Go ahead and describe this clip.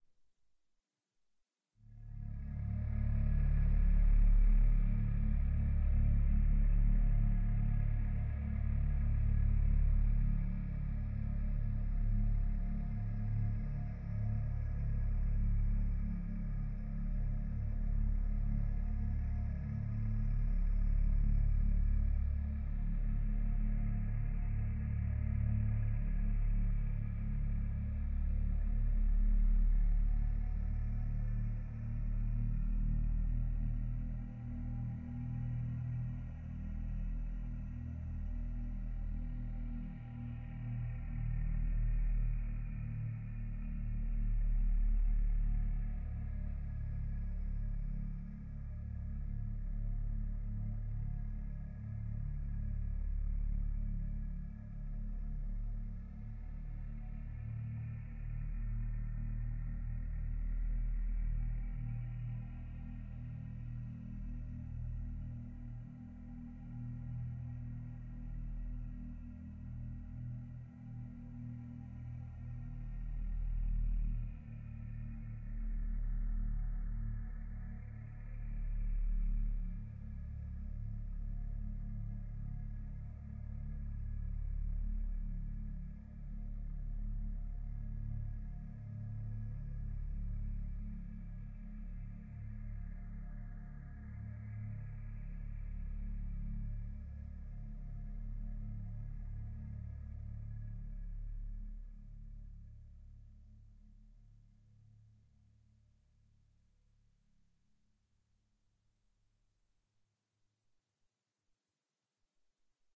Horror music to play on the background of horror themed projects. created by using a synthesizer and Recorded with MagiX studio.